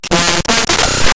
short clips of static, tones, and blips cropped down from raw binary data read as an audio stream. there's a little sequence marked as 'fanfare' that tends to pop up fairly often.
data, digital, electronic, glitch, harsh, lo-fi, noise, raw